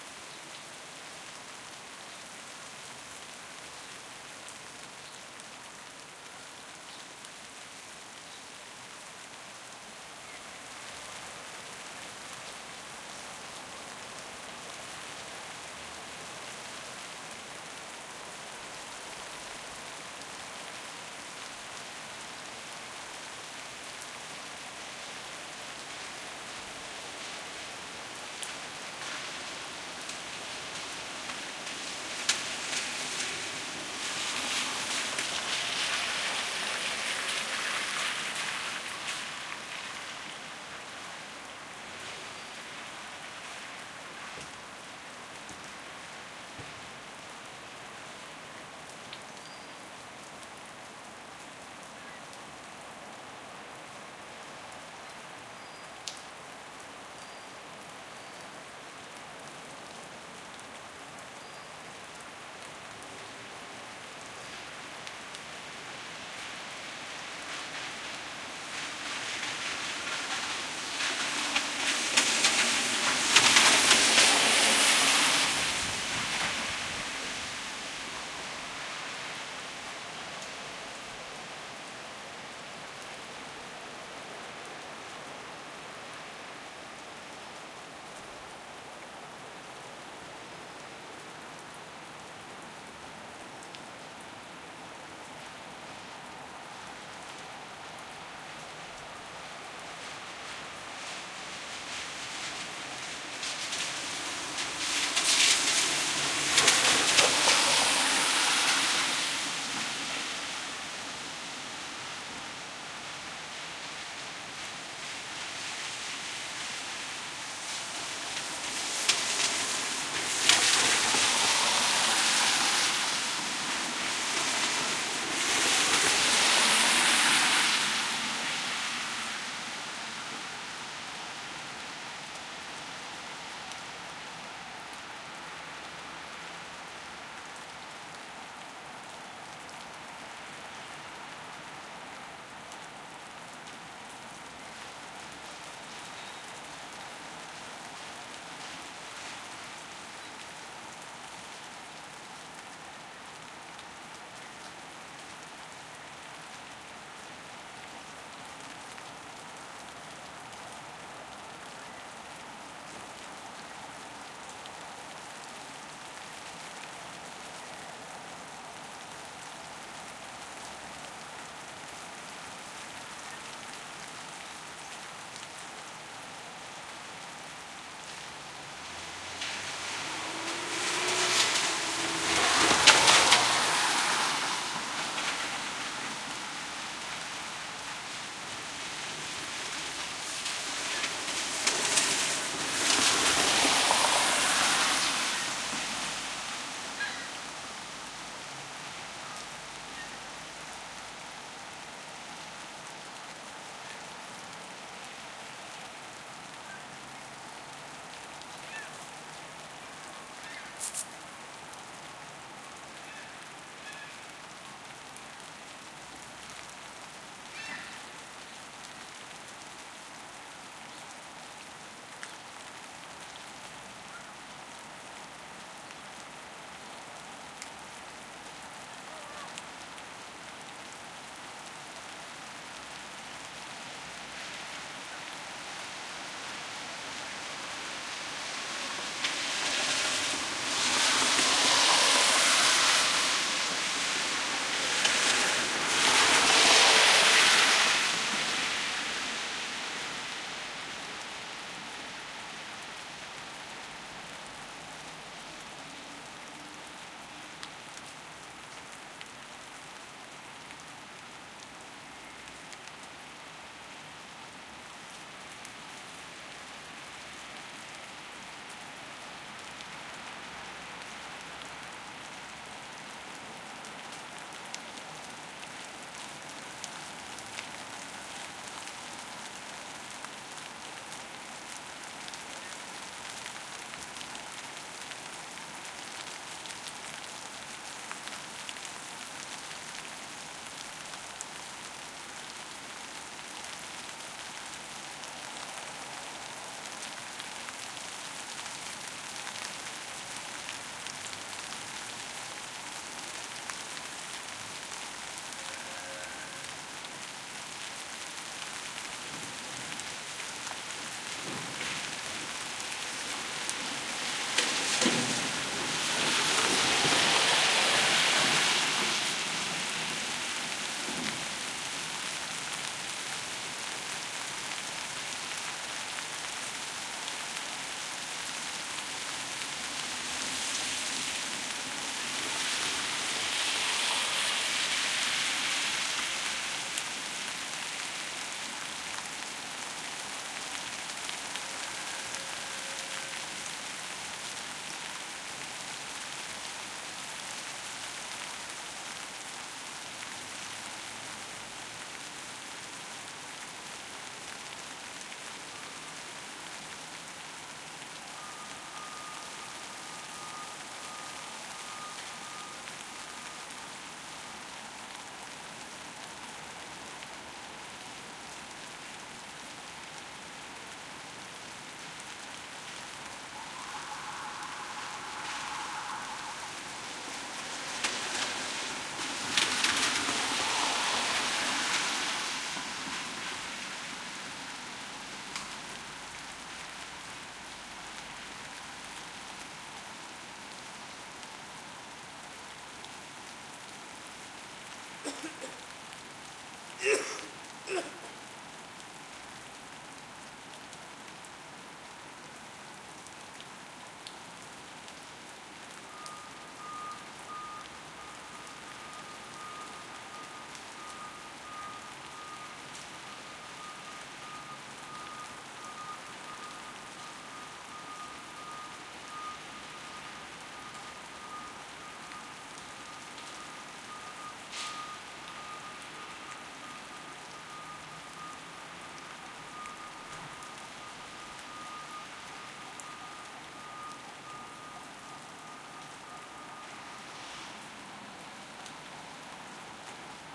auto cars pass wet light traffic country road medium speed in rain big puddle splash1 close good detail
light, wet, cars, speed, big, puddle, country, traffic, auto, rain, medium, splash, pass, road